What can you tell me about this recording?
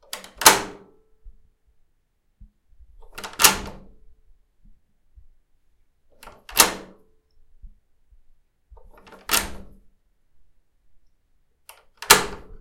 Locking Door 2
Locking the door.
door, lock, locking, locking-door